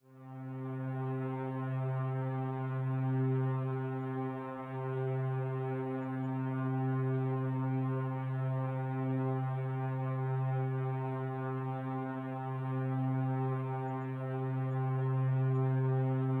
Yet another sound synthesized for use in the first collab dare.
My parter wanted to use some strings sounds and shared a sample with me as an example. There were other sounds to play at the same time so I designed these thin strings in Reason's Maelstrom synth (using a hign pass filter for the thin sound) so they would sit well in the mix without the need to EQ.